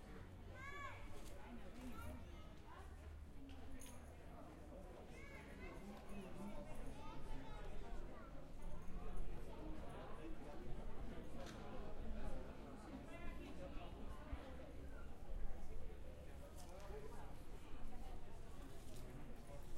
castleguimaraes people talking
Recorded in a Sunday in the Castle of Guimaraes, Portugal. Turists talking in portuguese and french at some point.